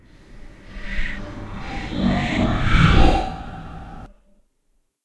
Evil Happy Thoughts
This is a joke. Each phrase was recorded on my Logitech USB mic, and I used Audacity to lower each one about 5 steps, gverb it, and reverse each one. There are three tracks here. The one in the center is me saying, "Clean your room." The one on the left is, "Always be respectful to your elders." The one on the right is, "Peace and love will always be the way." But after you do all that stuff to 'em, they sound nice and eeeeeeeeeviiiiilllll. Heheheheeeeee!